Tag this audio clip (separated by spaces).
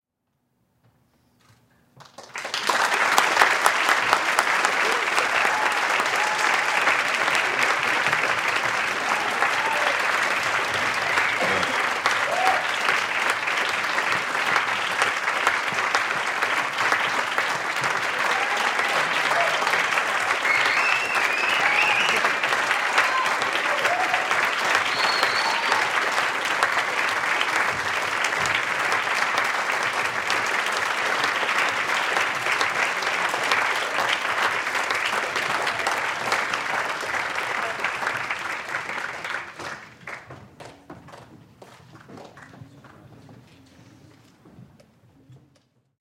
audience cheering enthusiastic applause